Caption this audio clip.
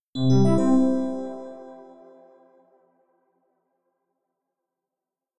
Start Computer
Please enjoy in your own projects! Made in Reason 8.
computer, mac, game, initiate, startup, load, application, interface, windows, boot, chime, pc